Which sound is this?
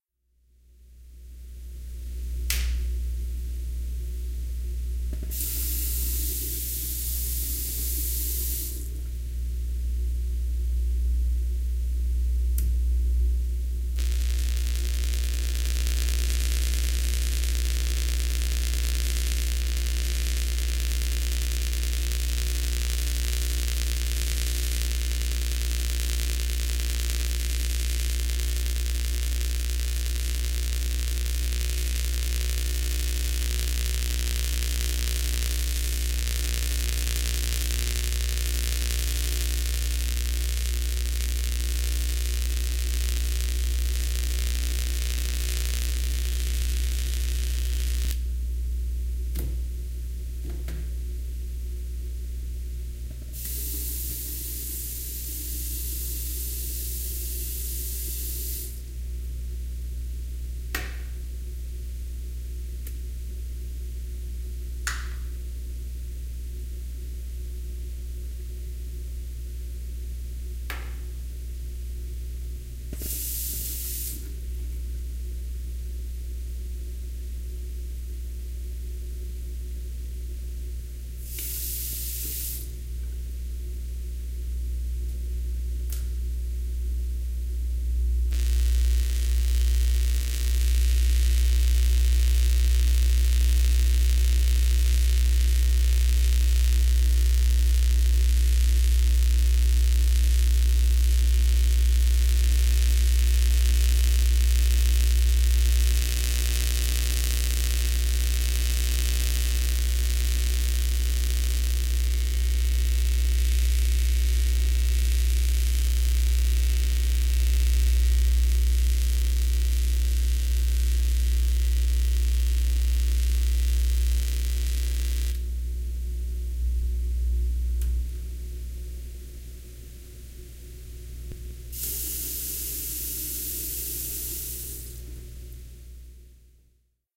je shaver
Recording of shaving with an electric razor
motor, buzz, shaving